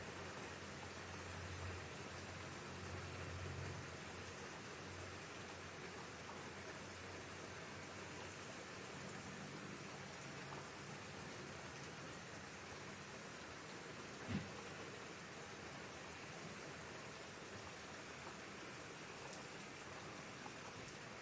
Seamless Rain Medium
Another seamless rain sample. This one is a slight bit heavier!
As usual, credit is hugely appreciated but not necessary! Use by anyone for anything!
If you don't..
weather, rain, seamless